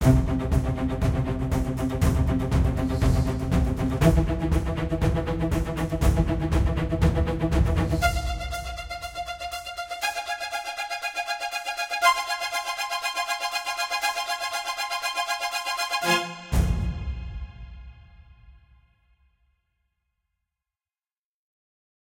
Drums and Strings dramatic intro
A String and Drum Intro made for dramatic movie scenes. It s Composed with Sequioa and under the use of Kontakt Sampler with varius Lexicon Reverbs.
Cineastic, cinema, Cinematic, Classic, drama, dramatic, dramatik, Drums, Ensamble, Epic, Epic-Drums, Epic-Percusion, fear, film, kino, Klassik, Loop, Mike, Mike-Woloszyn, movie, Percusion, score, sinister, Strings, thrill, Tunk, Woloszyn